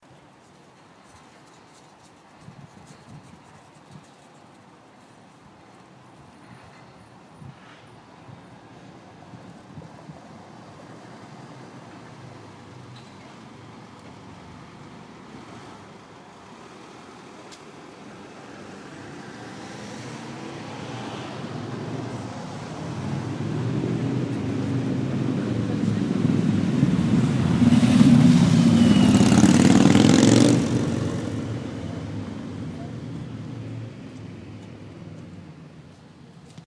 motorcycle passing on street
Motorcycle passing by in the city.
traffic, pass-by, motorcycle, road, passing